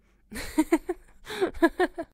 Woman laugh
happiness, girl, humor, joy, woman, female, laugh